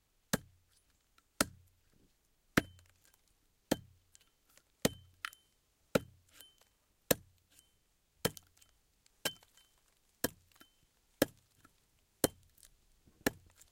Recoreded with Zoom H6 XY Mic. Edited in Pro Tools.
A few axe hits with a little bit of ringing effect.